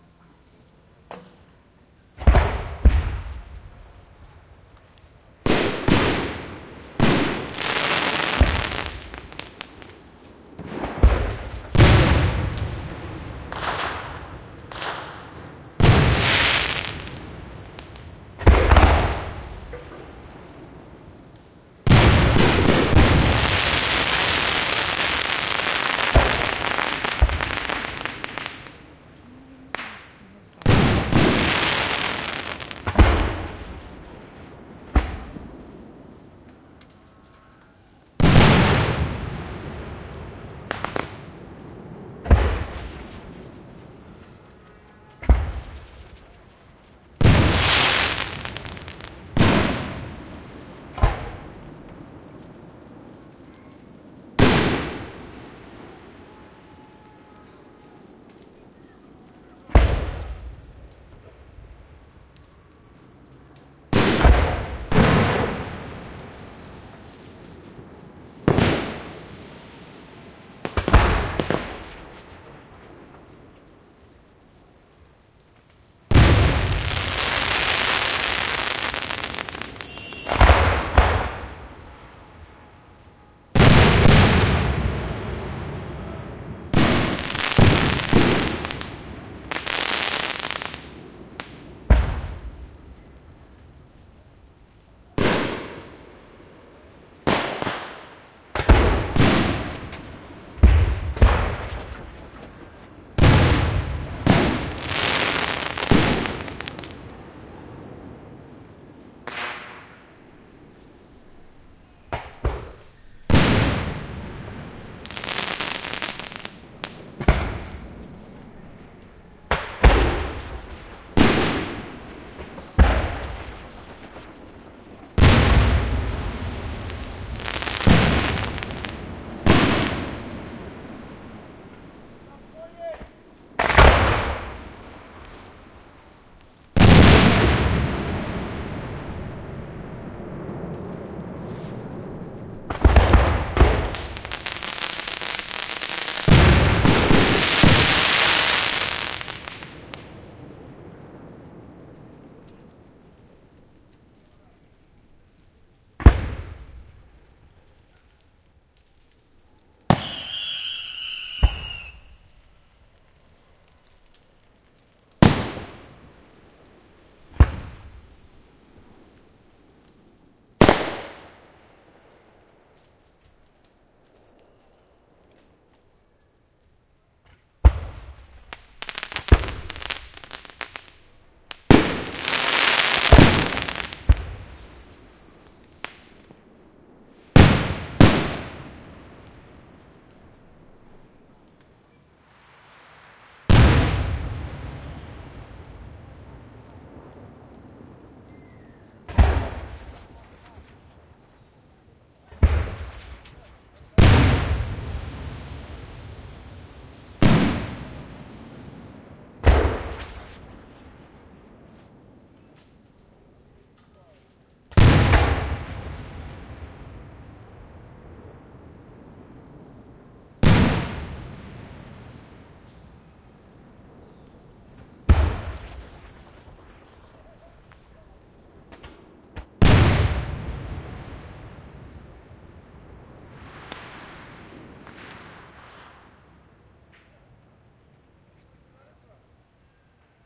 FireWorks PortCarbon2004 FSP4816
This recording was made in the parking lot of a church. On the other side of a line of trees was the launch pad for the towns fire work show. The mic is angled at the sky. Along with the big round booms and flat smacky booms you can also make out the swish as rockets swirl through the air. In between booms, whistles and fizzles you you can also hear the sound of the debris from the cardboard shells falling around me. Sometimes they were on fire, though you can't hear that quality in the audio. It's good for fireworks and is pretty easy to EQ for more of a distant quality. I find it's more fun using it to sweeten weapons. The full recording is 29 minutes.